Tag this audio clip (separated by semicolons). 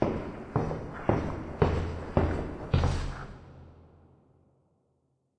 concrete; feet; foot; footstep; footsteps; running; step; steps; walk; walking